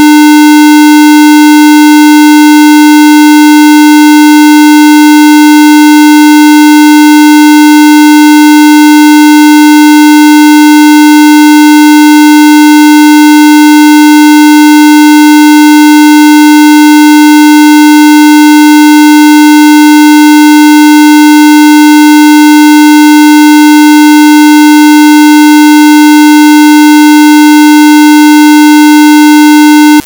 My third experience is different. I would try to use weakness and strong frequency (500 Hz and 150 Hz)
The son is like a bug in a computer and that's i had search.